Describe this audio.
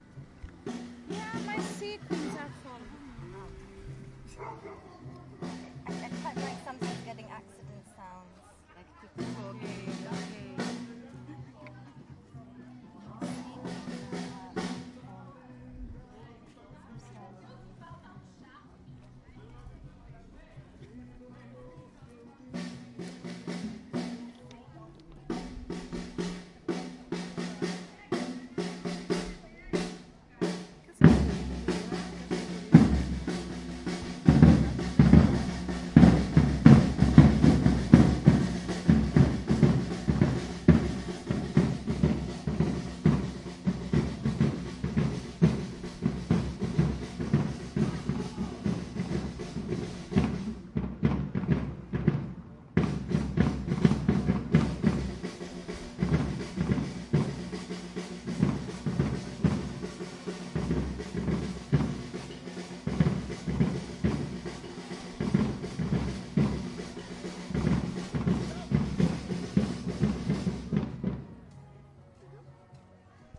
Drumming band Olinda
A little chat followed by the start of a drumming band. Olinda, Brazil
Chatting, drums